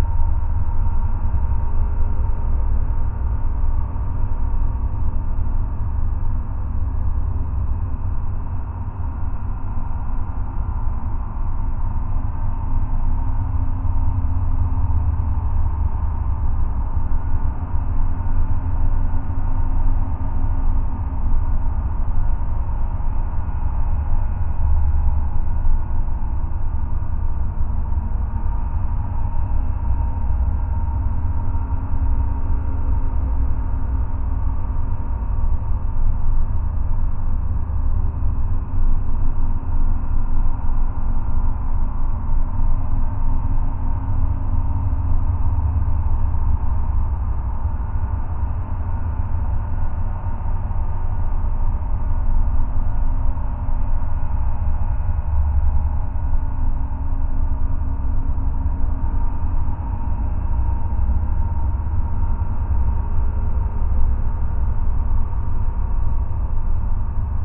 archi soundscape cavern1
Instances of Surge (Synth) and Rayspace (Reverb)
Sounds good for cavern soundscapes.
air, airflow, ambiance, ambience, ambient, atmosphere, cave, cavern, drone, soundscape